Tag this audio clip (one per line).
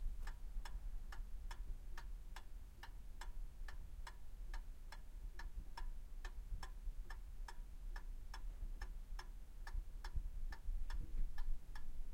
Clock
time
tick
clockwork
mechanism
grandfather
clocks
old
atmospheric
loopable
ticking